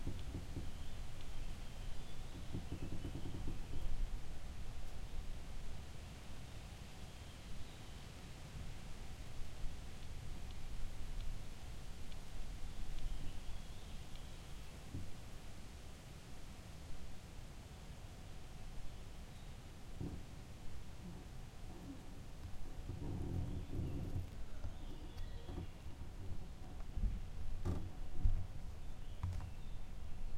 Background Noise, Leafs, gentle creaking